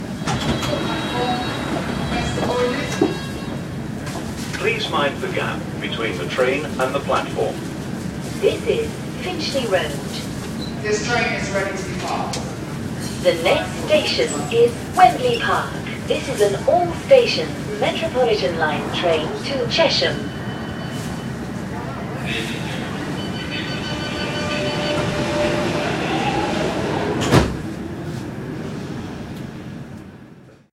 London Underground- train at Finchley Road
A tube train arrives at a station. Opens its doors, 'this station is...next station...' announcements, 'mind the gap', announcements from in the station, another train departing, doors closing. Recorded 19th Feb 2015 with 4th-gen iPod touch. Edited with Audacity.